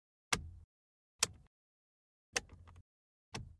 button sounds for a button pack